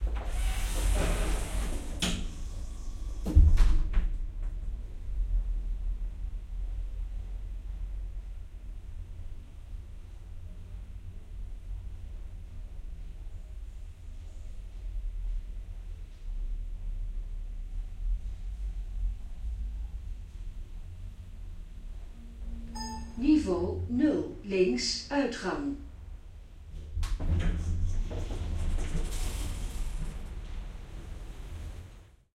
slow railway station lift descending (Muiderpoortstation Amsterdam).
EM172-> ULN-2
Muiderpoortstation, Amsterdam, dutch, descending, railway, lift, station